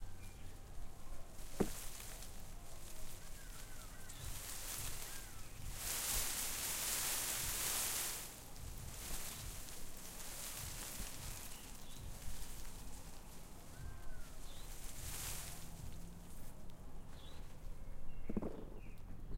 Rustling foliage
This was recorded with an H6 Zoom recorder at my home where I shook some of the branches of my trees to give this sound of rustling in bushes.
rustling, plant, leaves, nature, field-recording, OWI